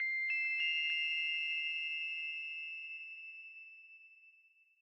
Synth Melody 3
These sounds are samples taken from our 'Music Based on Final Fantasy' album which will be released on 25th April 2017.
Sample, Music-Based-on-Final-Fantasy, Lead, Synth